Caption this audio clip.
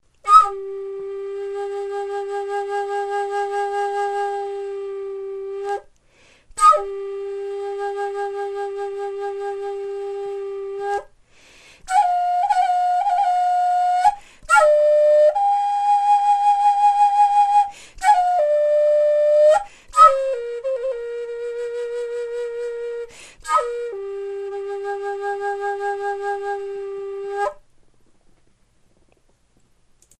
This style of flute playing is probably what you think of when you think of the Native American Flute. This sound file is WITHOUT echo so you can add your own to your liking. When you overblow, the sound jumps up one octive higher. This flute was crafted in the key of G and is made from western red cedar which produces a very mellow sound.
g, native-american-flute, cedar, overblowing